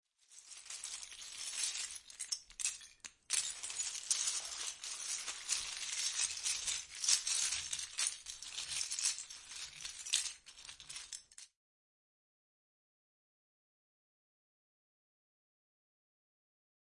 15GGalasovaK lego#1
this sound is a when you goes throught tha lego